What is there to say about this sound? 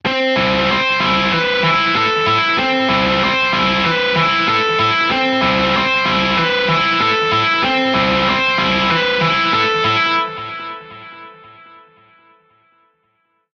C Guitar Lead
95 BPM Guitar lead in C. 4 loop-able measures of electric lead guitar. Made in Soundtrap as a MIDI track.